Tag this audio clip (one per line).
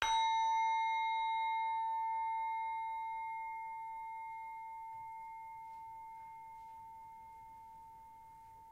temple
monastery